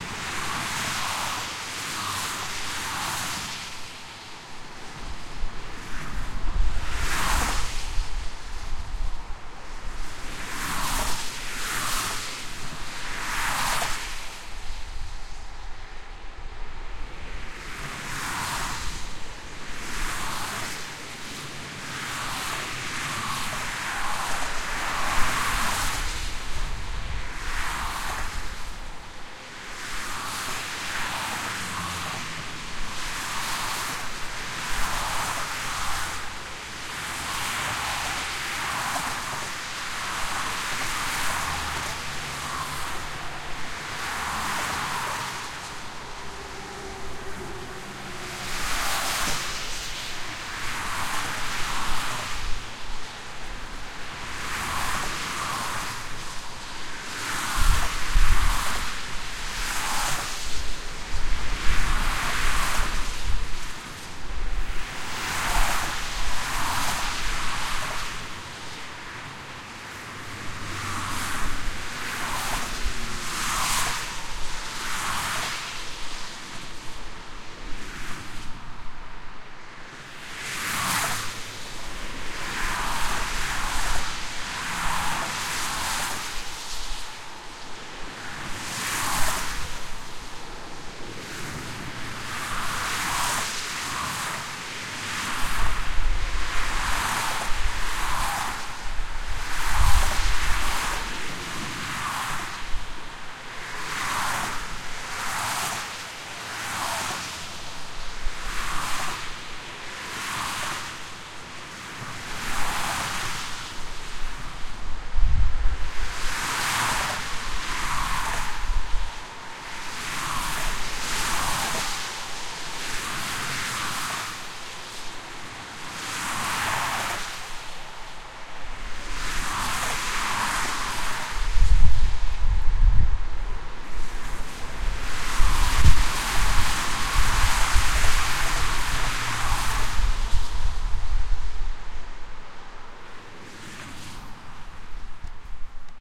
Immediate near highway
Flawed by wind unfortunaly, this is an unedited version, you might need to cut away the worst part at the end.